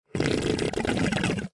suck in 1
various sounds made using a short hose and a plastic box full of h2o.
bubble, bubbles, bubbling, drip, liquid, suck, sucking, water